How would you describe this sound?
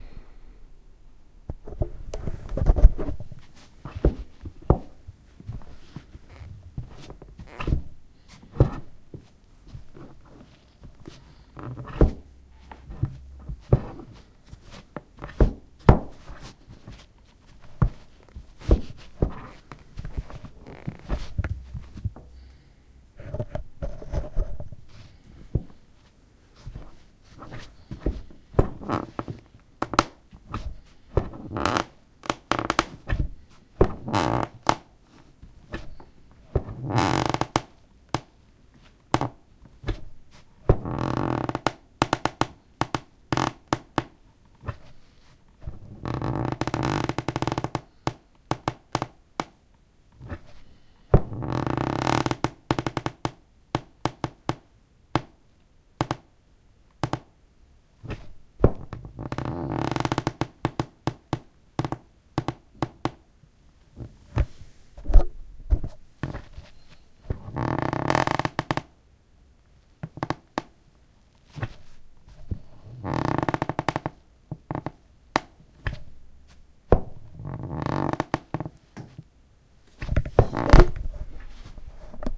the sound of squeaky floorboards in a house in london
house, squeaky, floorboards, squeaking, walking, squeak, creaky, london, floor